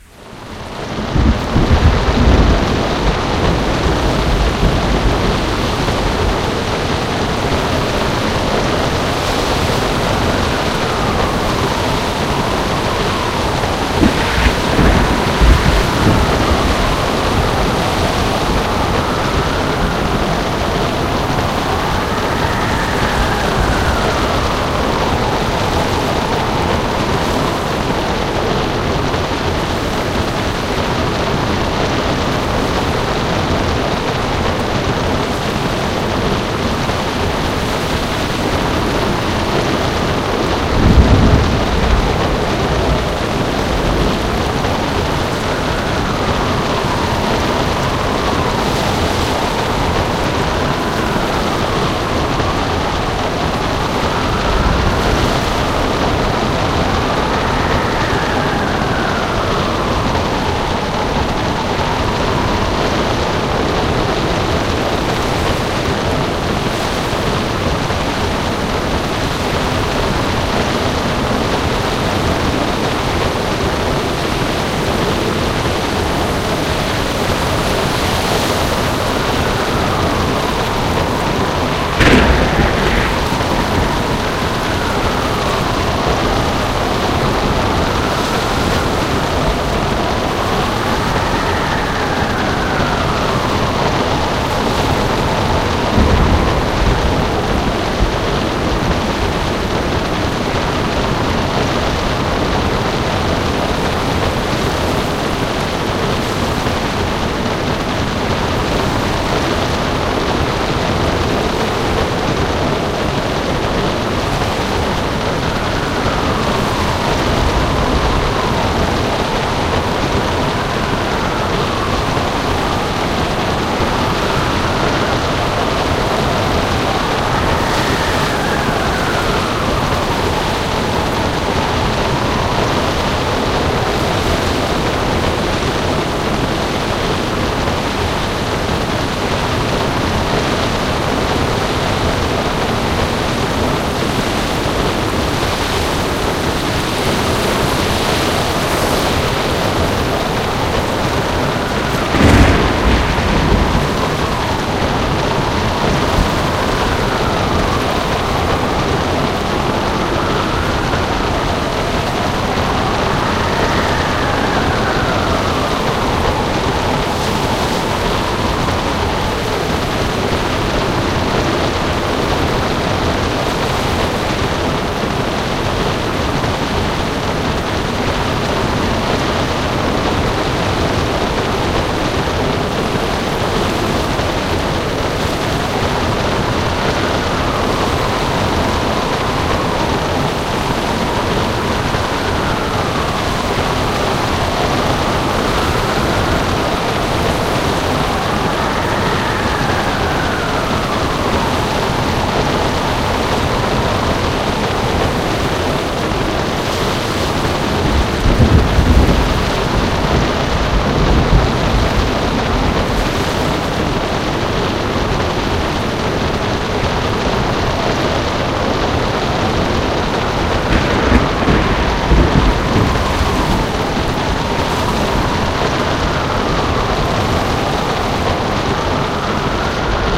A combination of wind and water sounds to simulate a storm at sea
ocean, sea